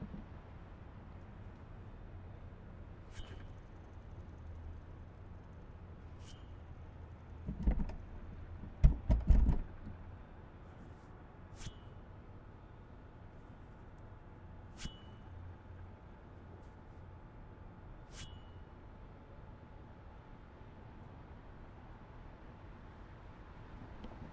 Metal Pole Hand slip sequence
Losing grip on a metal pole.
pole, slip